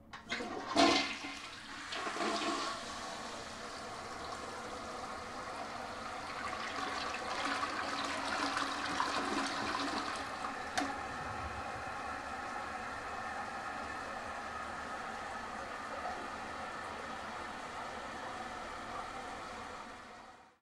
A toilet was flushed to create this sound.